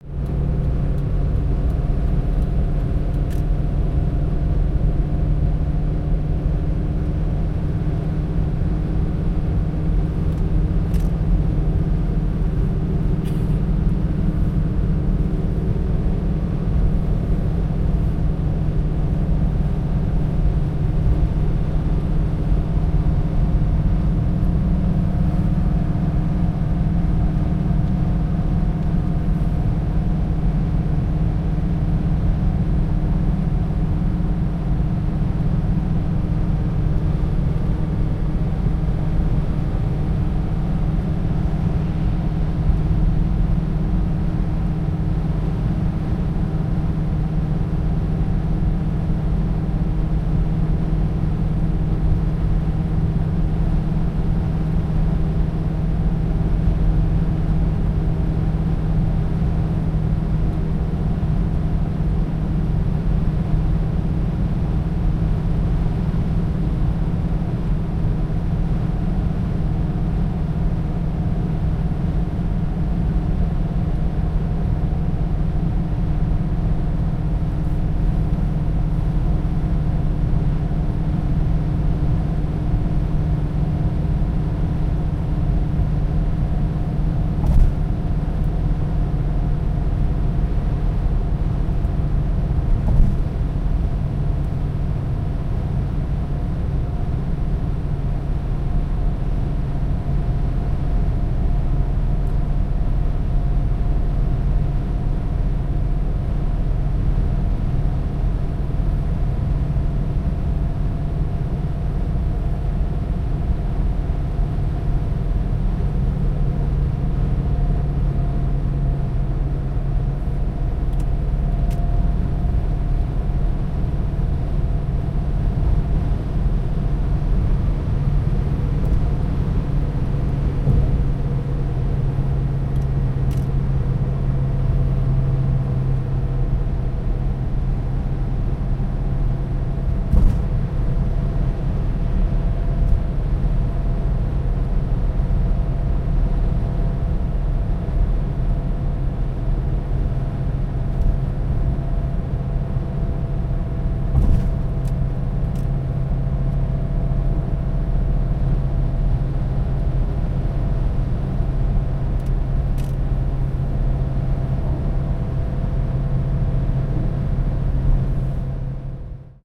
Car on Highway Inside Fiat Punto
Inside a Fiat Punto on a German highway / autobahn;
driving at about 130 km/h;
indicator lights from time to time;
(stereo, recorded with Marantz PMD620 Field Recorder)
inside, motorway, interior, car, autobahn, driving, highway